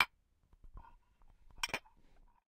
Two small Pyrex bowls tapped against each other. Dry, glassy sound, fairly quiet. Close miked with Rode NT-5s in X-Y configuration. Trimmed, DC removed, and normalized to -6 dB.